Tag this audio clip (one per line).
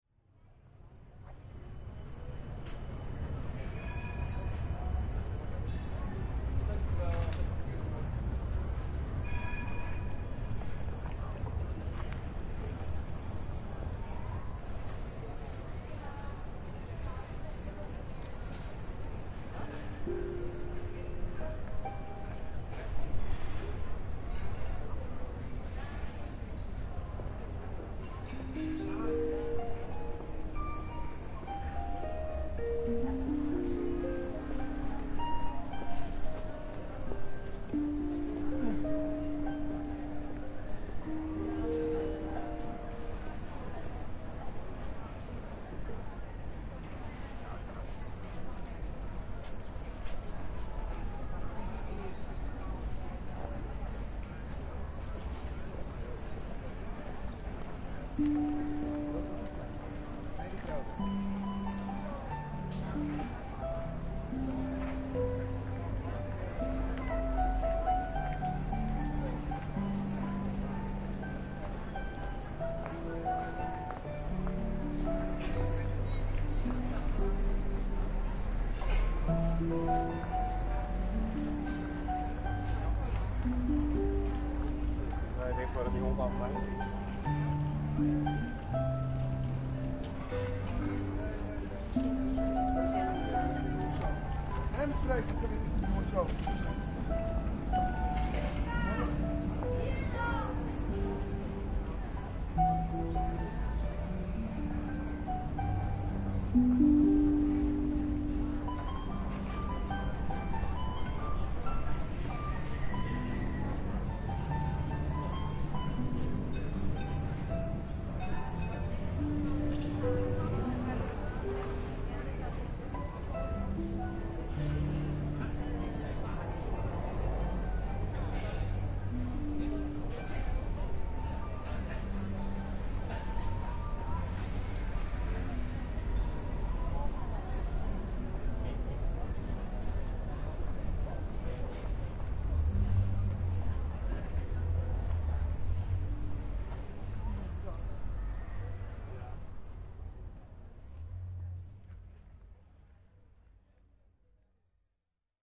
amsterdam
city
city-ambience
field-recording
general-noise
harp
street-music